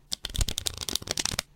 Shuffling deck of cards on desk in front of cheap radio shack condenser mic.